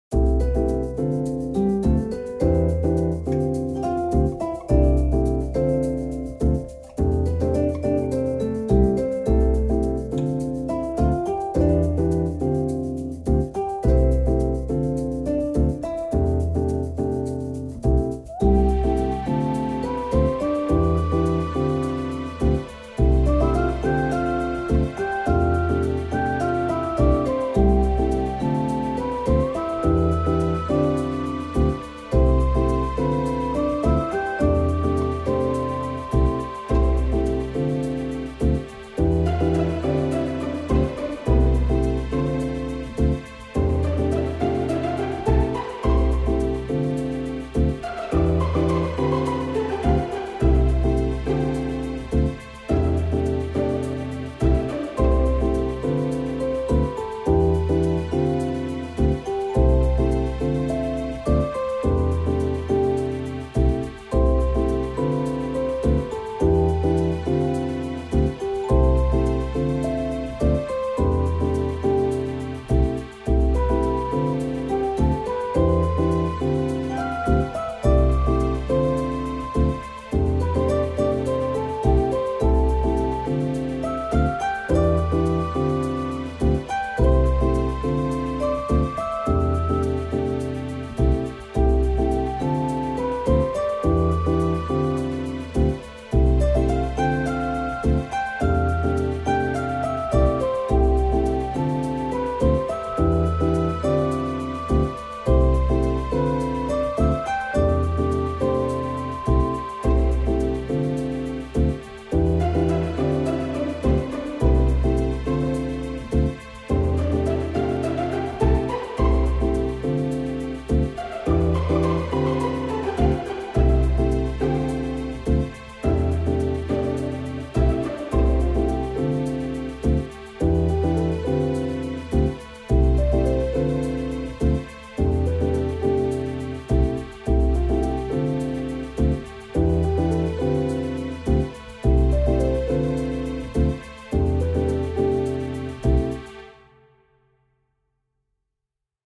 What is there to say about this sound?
Background Music
background-music, guitar, music, percussions, shakers